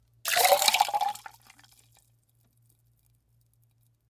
Martini Pour into Glass Quick FF306
Fast pour of liquid from martini shaker into glass with ice, ice hitting sides of glass, liquid sloshing
glass, ice, liquid, martini, pour, shaker